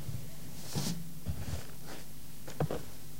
cloth contact
A piece of cloth that's being brushed by the side of a metallic object.